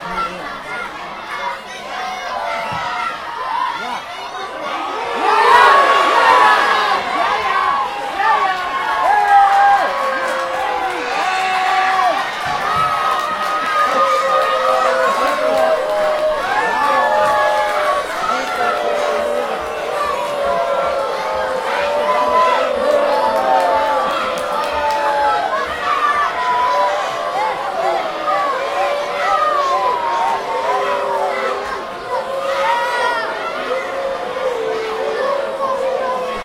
Soccer stadium Booohh
Field recording of a Dutch soccer match at the Cambuur Stadium in Leeuwarden Netherlands.
crowd, football, footballmatch, match, public, soccer, stadium